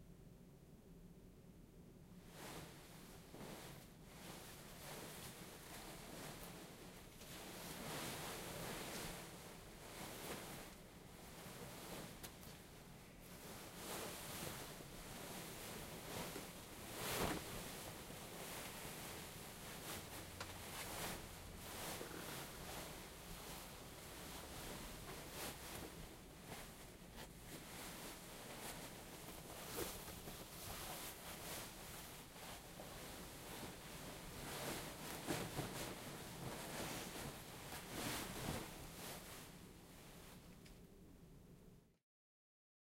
Putting on a satin dress
The sound of putting on a dress